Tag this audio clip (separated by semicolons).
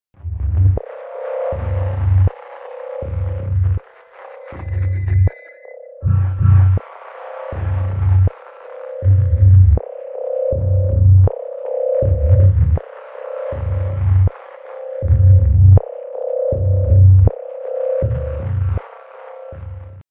Alien; Deep; Drone; Effect; Engine; Film; Foley; Fx; Game; Movie; SciFi; Sfx; Ship; Space; Spaceship; Universe